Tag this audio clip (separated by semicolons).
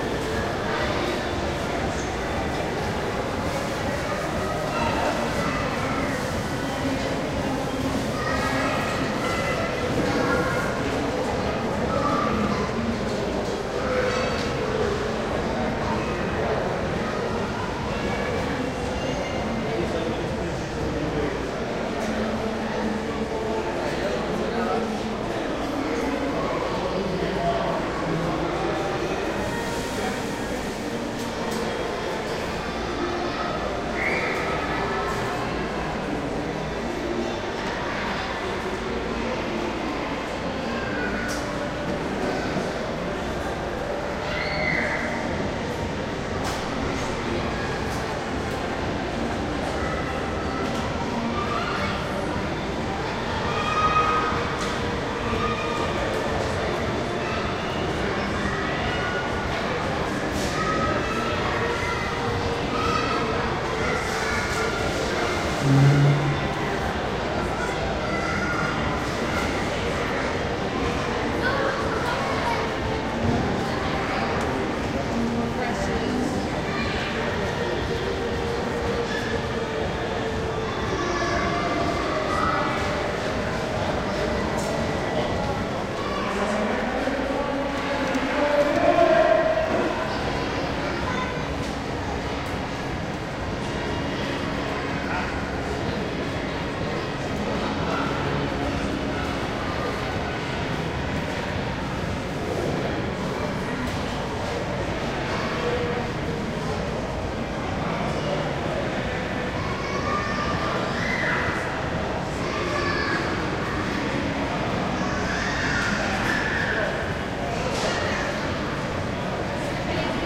ambience; field-recording; mall; murmur; public